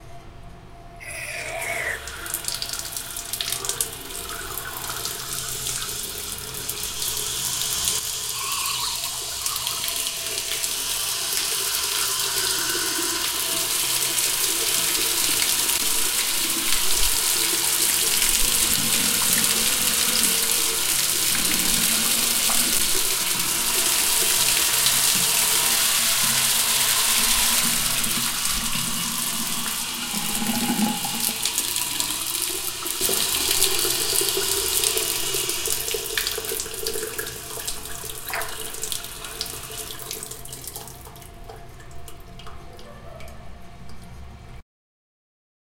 Water Faucet 1
dental, hygiene, water, cleaning